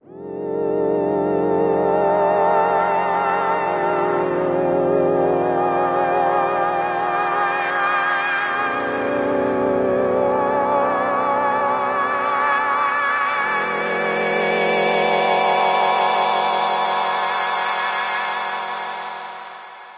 A pad made with crystal
1, pad